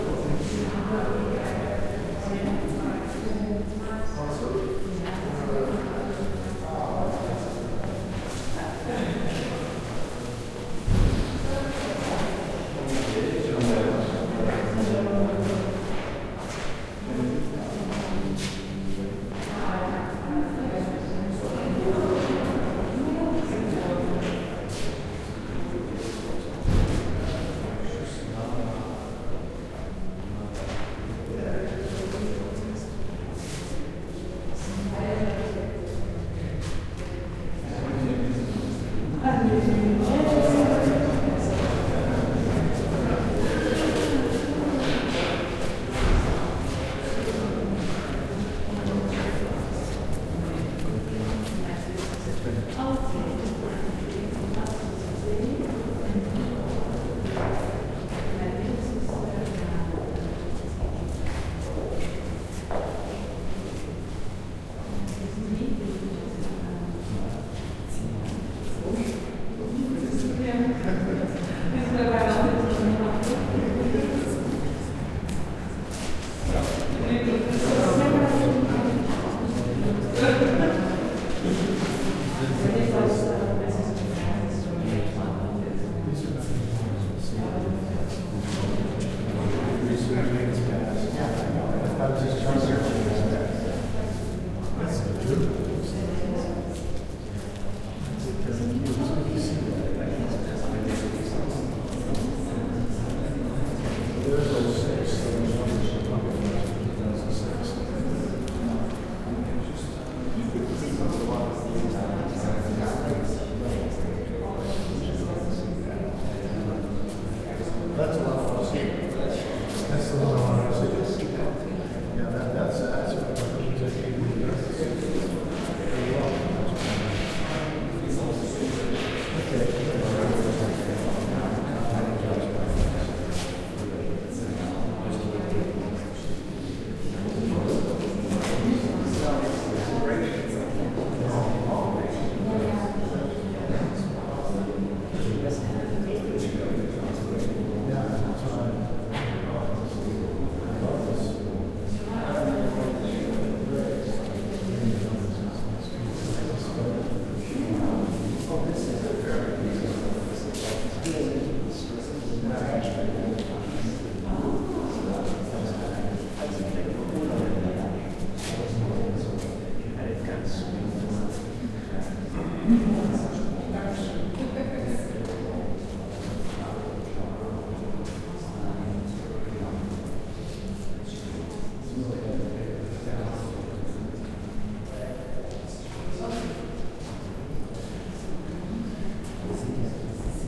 art gallery
I made this recording sitting in the center of a small gallery. You can hear people chattering, the sound of the room, and a woman blowing her nose. This recording was made at Matthew Marks Gallery in New York, NY, USA on the afternoon of 25 March 2008 with a Zoom H4. Light post-production work done in Peak.
ambience, new-york-city